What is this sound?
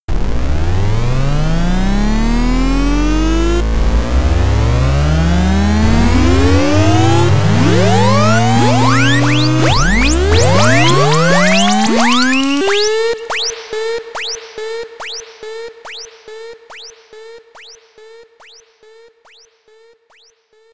Special Effect Created using Audacity.